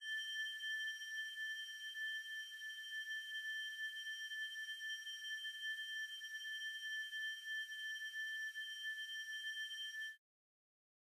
Applied Hourglass to some other glass-bowing sounds, this one is more like a bell.